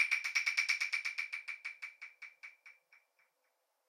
claves decres
This pack contains samples of claves, a type of percussion instrument. Included are hits at various dynamic levels and some effects.
click, sticks, wood, percussion, latin, orchestral, claves